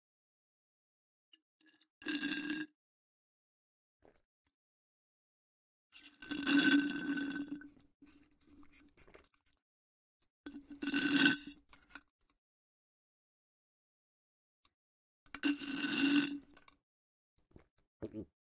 Slurping drink with a straw and swallowing it.
Recorded with a Rode NT5 microphone.
Bottle, Drink, Gulp, Liquid, OWI, Slurp, Straw, Swallow